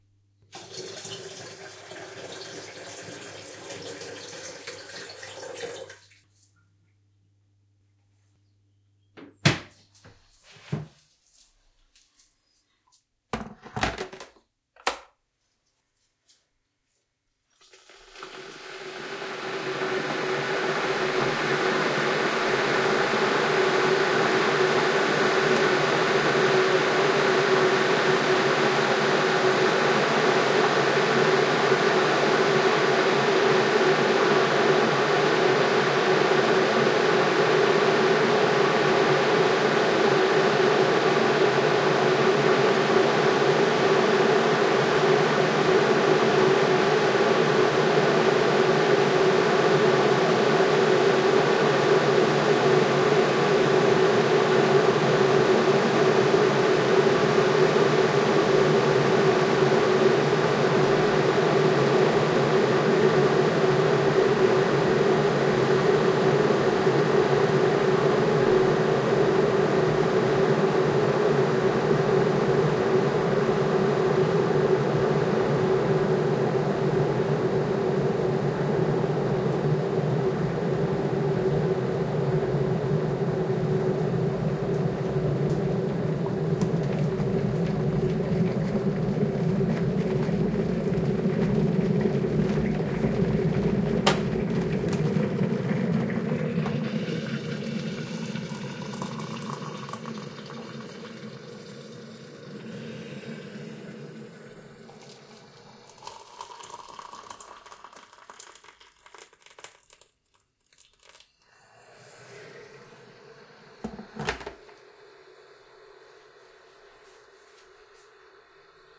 Making tea from filling the kettle to pouring the hot water into two mugs.
Pair of Lom Usi microphones attached to a Zoom H5 recorder.
kettle, making-tea